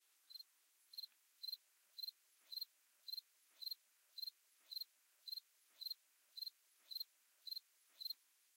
Close persp. cricket chirping
a good quality cricket chirping
bug, buzz, chirp, cricket, cheep, hum